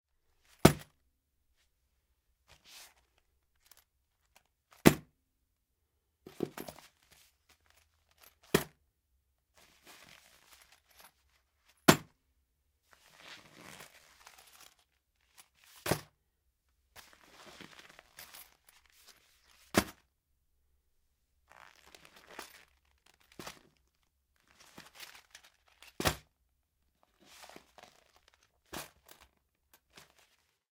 FOLEY wallet handling
sto, handling, novcanik, na